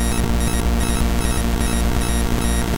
Punishments In Installments - Small Self-Scourgings -634
I had a goal for this pack. I wanted to be able to provide raw resources for anyone who may be interested in either making noise or incorporating noisier elements into music or sound design. A secondary goal was to provide shorter samples for use. My goal was to keep much of this under 30 seconds and I’ve stuck well to that in this pack.
For me noise is liberating. It can be anything. I hope you find a use for this and I hope you may dip your toes into the waters of dissonance, noise, and experimentalism.
-Hew
Harsh-Noise, Improvisation, Improvisational-Harsh-Noise, Improvisational-Noise, Improvisational-Power-Electronics, Noise, Noise-Fragments, Power-Electronics, Small-Noise-Loops